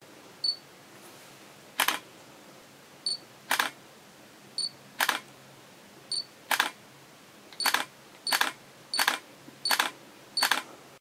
Camera beep & flash
A sound effect of a digital camera taking a photo